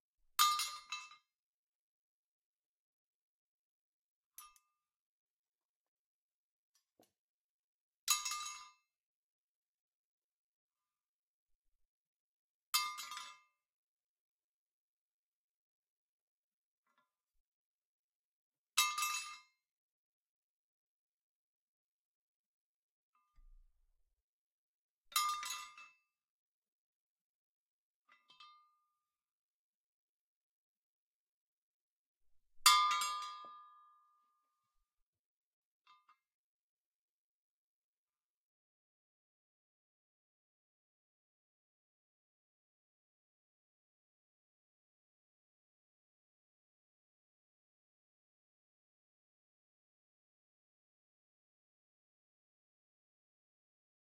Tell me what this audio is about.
Metal stick drops on steel wheel muffled
Metal stick drops on a steel wheel muffled by hand. A little bit shorter sounds.
drop, impact, muffled, wheel